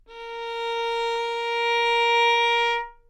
Part of the Good-sounds dataset of monophonic instrumental sounds.
instrument::violin
note::Asharp
octave::4
midi note::58
good-sounds-id::3802
Intentionally played as an example of bad-pitch